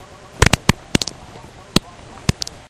almost didn't happen fart
fart poot gas flatulence flatulation explosion
explosion, fart, flatulation, flatulence, gas, poot